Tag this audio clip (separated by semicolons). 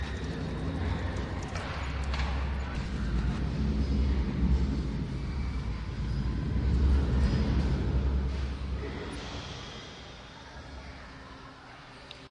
ambiance; amusement; field-recording; moreys-pier; nj; park; rides; wildwood